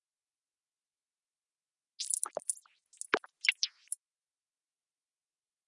a simple and bouncing solid yet flexible grain
stretch, delay, bounce, grain